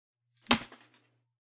Ball Impact
This sound clip is meant to be the sound of a ball, preferably made of paper, hitting the ground. It is made from multiple recordings of me dropping balls of paper on my computer desk.
paper, ball